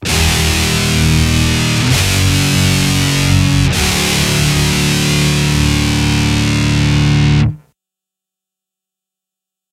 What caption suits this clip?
DIST GUIT FX 130BPM 7
Metal guitar loops none of them have been trimmed. that are all with an Octave FX they are all 440 A with the low E dropped to D all at 130BPM
2-IN-THE-CHEST, DUST-BOWL-METAL-SHOW, REVEREND-BJ-MCBRIDE